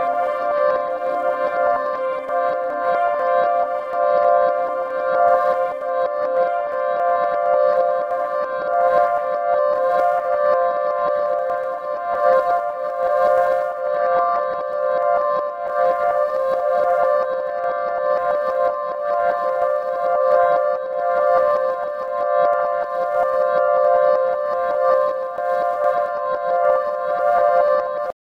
Little things mingle under rocks and logs.
ambient, atmosphere, cinematic, loop, molecular, piano, relaxing, rhodes